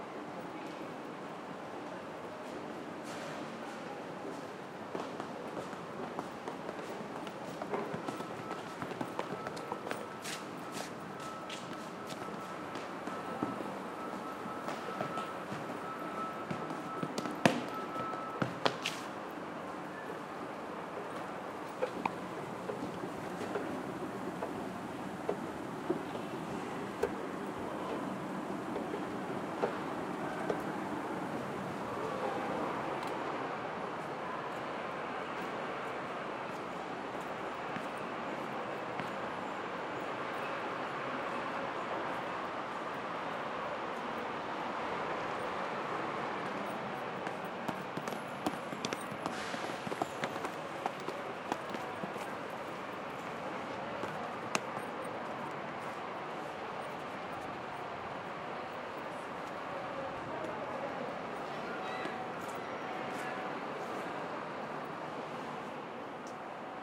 FX - pasos en estacion de autobuses